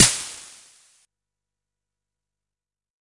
various hits 1 112
Snares from a Jomox Xbase09 recorded with a Millenia STT1
909, drum, jomox, snare, xbase09